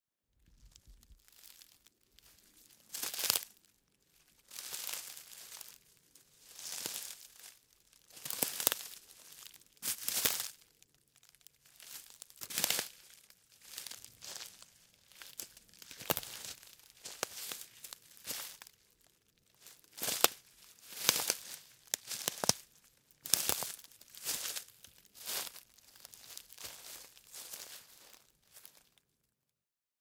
Footsteps Slowly Branches
branches, foot, footstep, footsteps, nature-sound, slowly, Started, step, walk, walking